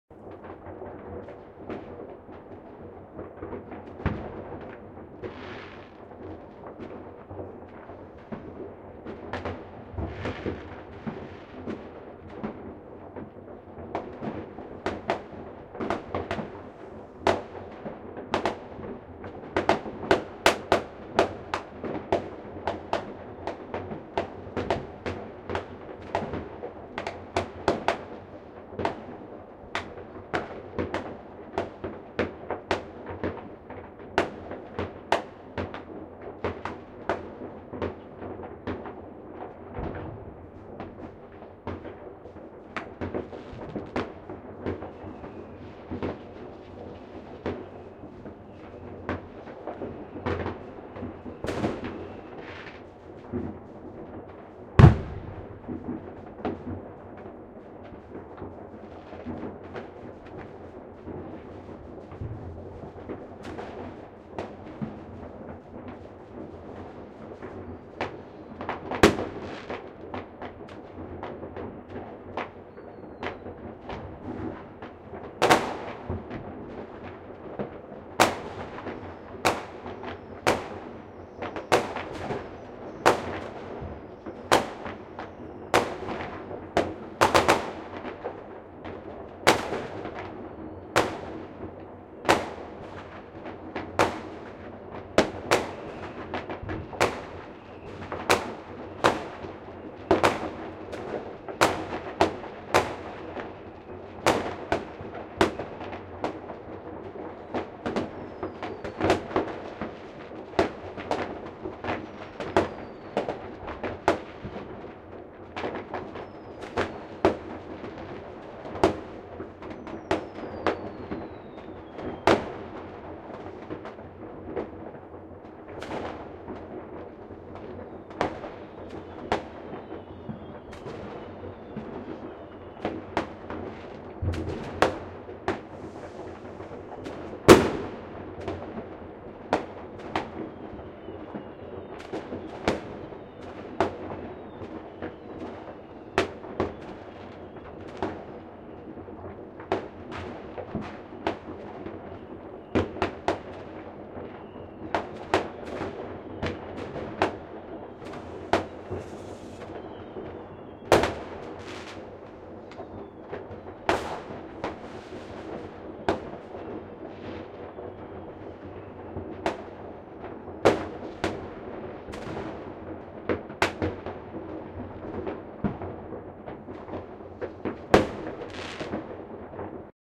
New Year's Eve Fireworks 2013/2014
This soundscape was recorded at 00:04 on wednesday the first january 2014 at Lüneburg (Lower Saxony, Germany). There are strong reflections due to the urban recording position, especially the brick houses nearby. The weather conditions were as follows:
Temperature: about 4°C
Wind speed: about 3.3 m/s
Relative humidity: about 75%
Amount of precipitation: about 0.25 l/m^2
It was recorded with Zoom H6 and it's XY microphone and Sound Forge Pro was used for slight editing (No manipulation of the sound material like compression etc).
noise, cracker, ambiance, banger, bunger, atmosphere, boom, field-recording, lueneburg, new-years-eve, firecrackers, atmos, ambience, rocket, ambient, soundscape, germany, explosion, 2013, explosive, firework, rockets, fireworks, bang, new-year, noise-maker, firecracker